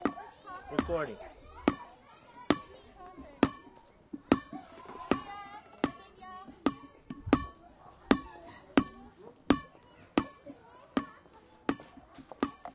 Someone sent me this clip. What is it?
From the playground